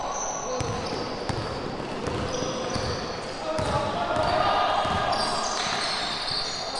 Pista grabada en un pavellon de baloncesto